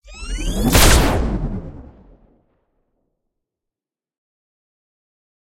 Sci-fi rifle weapon shot. With charging sweep 3 (23lrs,mltprcssng)
The sound of a sci-fi rifle shot. Enjoy it. If it does not bother you, share links to your work where this sound was used.
blaster, military, sfx